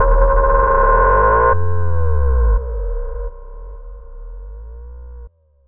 Viral Infection FX 02